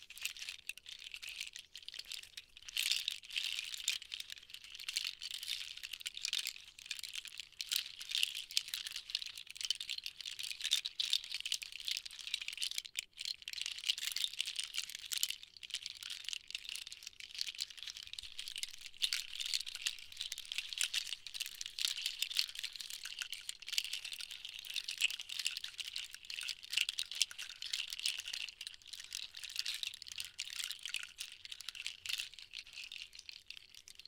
Soft Loop sample for a wood and seeds rattle for virtual instruments